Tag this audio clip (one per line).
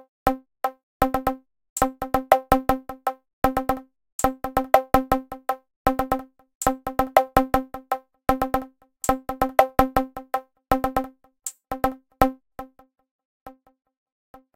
ConstructionKit
dance
electro
electronic
rhythmic